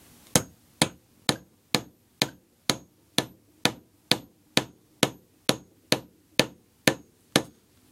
Multiple hits with a hammer on wood.